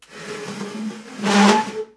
chaise glisse11
dragging a wood chair on a tiled kitchen floor
tiled, floor, chair, wood, furniture, dragging, squeaky